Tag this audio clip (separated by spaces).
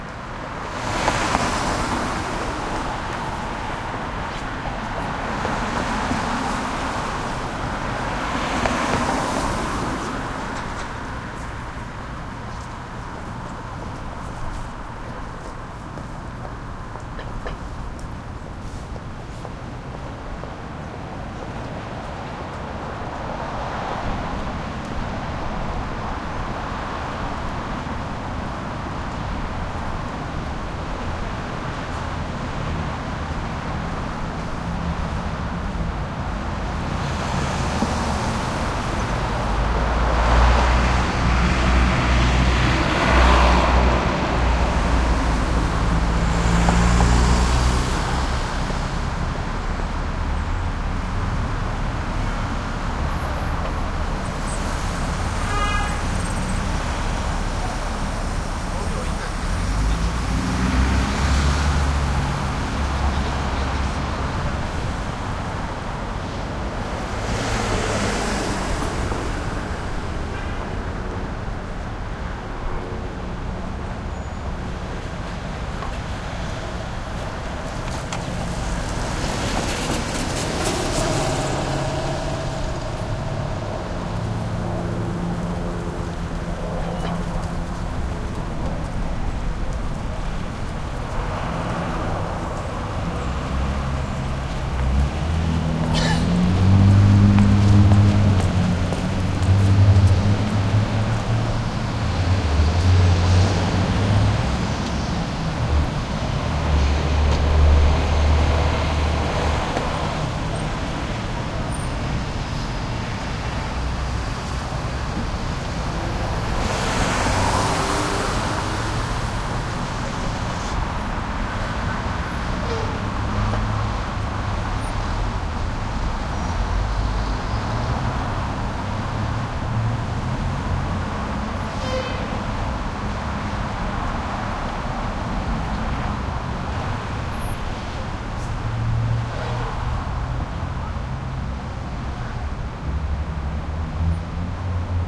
boulevard busy Canada heavy medium Montreal trucks wider